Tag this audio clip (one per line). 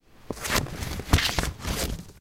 campus-upf
chop
crai
cut
cutting
library
paper
scissor
scissors
slice
upf
UPF-CS14